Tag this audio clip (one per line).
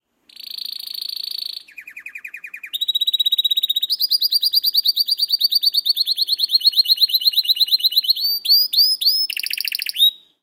bird
cage
canary
field-recording
metal
singing-bird
tweet